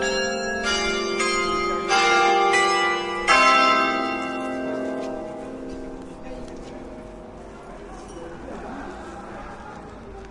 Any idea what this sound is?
20100404.Brussels.carillon
short take of the Carillon du Mont des Arts, Brussels. Olympus LS10 internal mics
brussels, field-recording